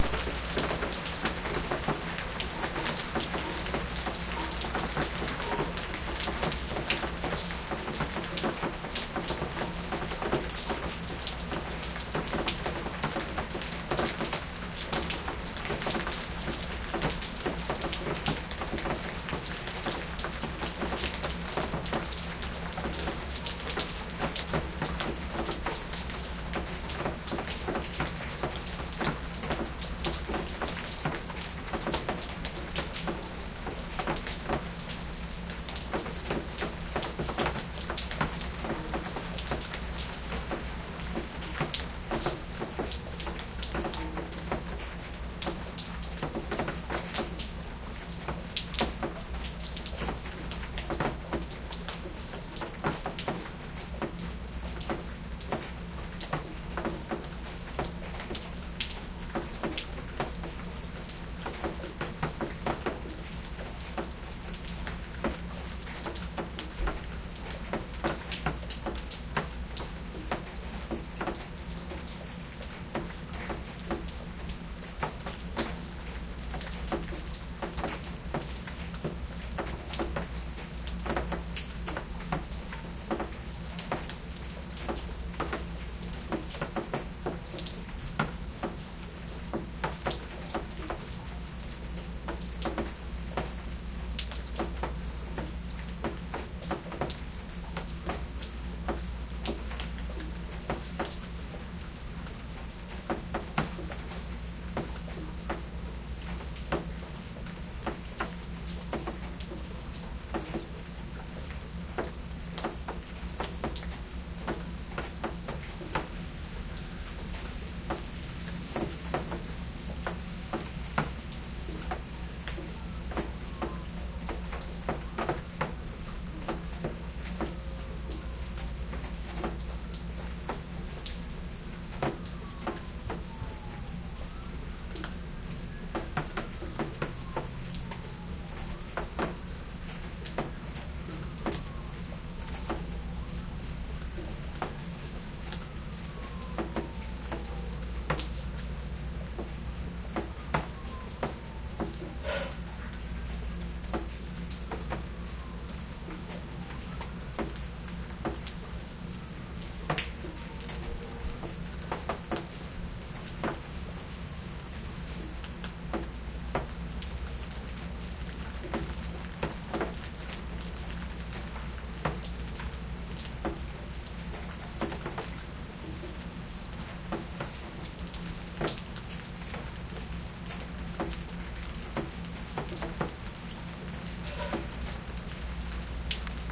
Rain drops falling near an Olympus DS-40.
ambience, drip, drop, rain, weather